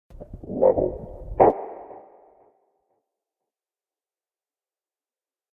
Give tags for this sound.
game
games
level
robot
rpg
up

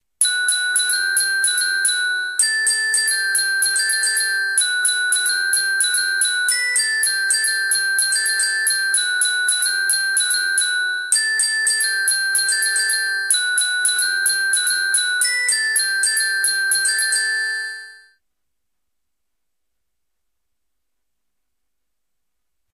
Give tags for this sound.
bitch
hip

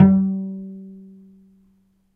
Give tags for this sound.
acoustic
cello
multisample
pizzicato
pluck
strings
zoom

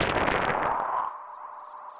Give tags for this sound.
analog
analouge
artificial
atmosphere
blast
bomb
deep
filterbank
hard
harsh
massive
perc
percussion
sherman
shot
sweep

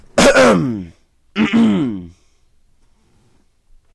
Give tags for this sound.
Throat
Clear
Neck

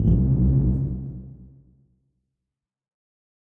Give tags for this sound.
sound
glitch-hop
random
dance
acid
rave
club
synthesizer
porn-core
bpm
resonance
effect
bounce
electro
glitch
noise
lead
synth
dark
sci-fi
110
electronic
blip
house
trance
processed
hardcore
techno

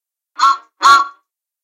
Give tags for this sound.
Whistle
Bakery
Truck
Helms

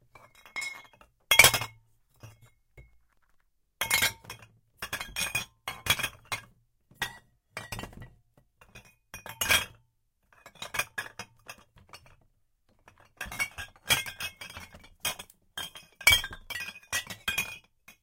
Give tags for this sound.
bottle
bottles
clean
garbage
tidy
trash

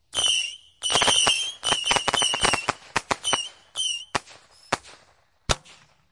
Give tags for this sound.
fireworks
whiz
bang
crackle

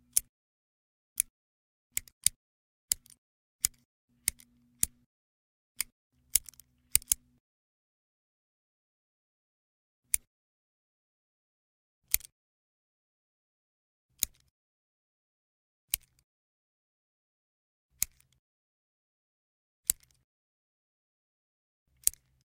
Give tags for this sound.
cutting
Paper
scissor
scissors

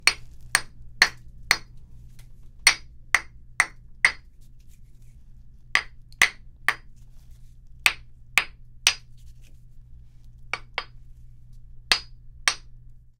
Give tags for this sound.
Cincel
Piedra

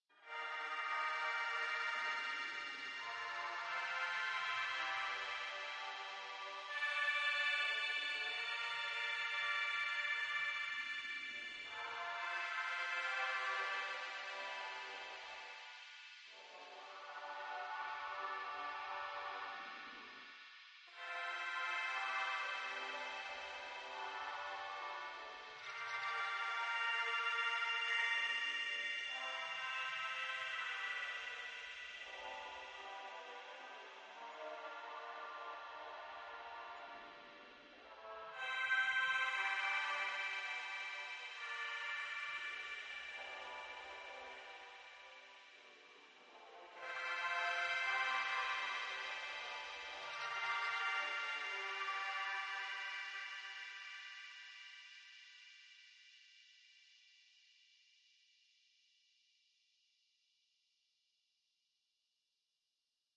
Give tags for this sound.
artificial
drone
evolving
ex
experimental
multisample
reaktor
soundscape
soundscapes

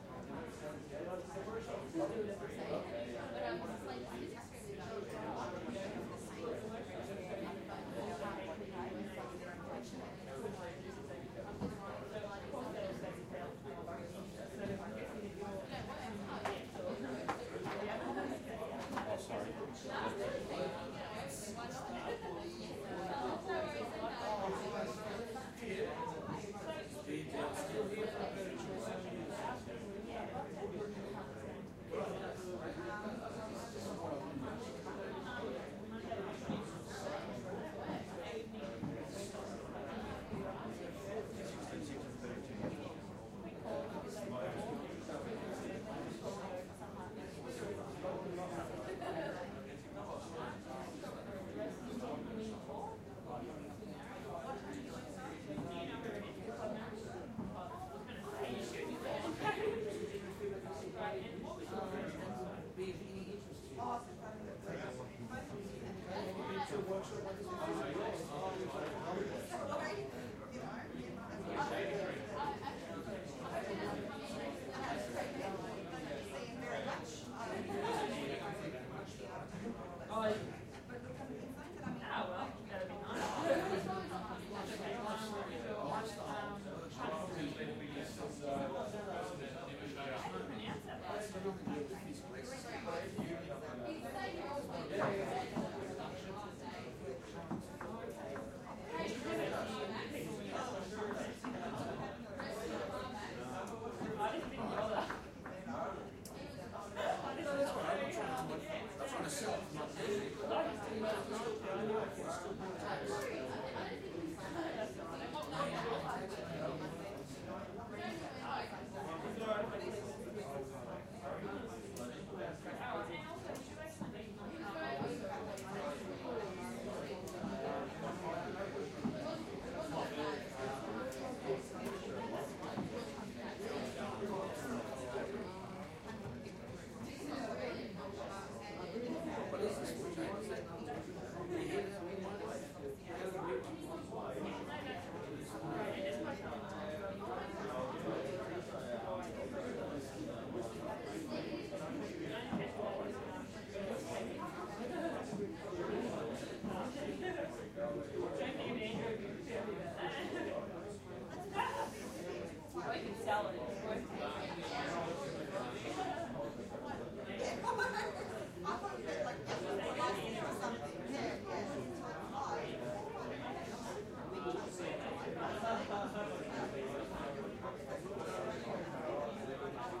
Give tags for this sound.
ambience
atmosphere
background
environment
indoor
inside
office
perspective
phones
ringing
voices
walla
wide

